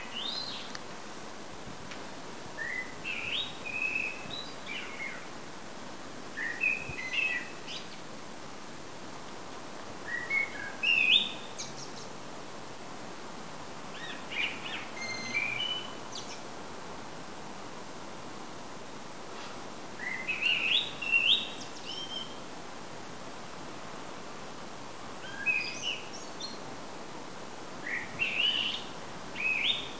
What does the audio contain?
A blackbird singing - kind of. Maybe it's practising ;)
bird, bird-song, blackbird, field-recording